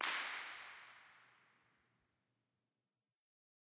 3AUC IR AMBIENT 001
These samples were all recorded at Third Avenue United Church in Saskatoon, Saskatchewan, Canada on Sunday 16th September 2007. The occasion was a live recording of the Saskatoon Childrens' Choir at which we performed a few experiments. All sources were recorded through a Millennia Media HV-3D preamp directly to an Alesis HD24 hard disk multitrack.Impulse Responses were captured of the sanctuary, which is a fantastic sounding space. For want of a better source five examples were recorded using single handclaps. The raw impulse responses are divided between close mics (two Neumann TLM103s in ORTF configuration) and ambient (a single AKG C426B in A/B mode pointed toward the roof in the rear of the sanctuary).
ambient, united, impulse, choir, third, location-recording, response, avenue